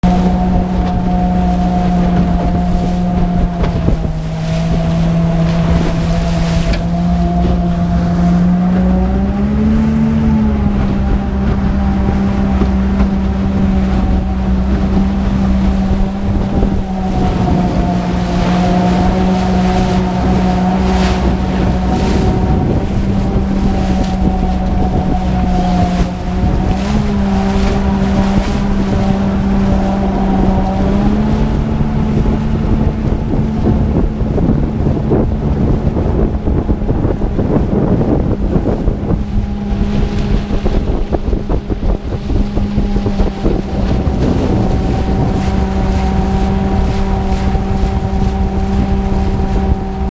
Boat on River
Recorded using GoPro of a trip heading upstream in a motorboat on a remote Canadian river
engine-noise, motorboat, boat-in-river, noise, field-recording, motor-boat, engine, river, sounds